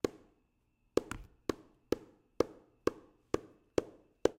This sound has been created by hitting a small bottle of lotion against a notebook. Changing the location of where the lotion hit the notebook and how much force was used. This sound has only been cut and faded but no other effects have been added.
Experimental, MTC500-M002-s14, Sounds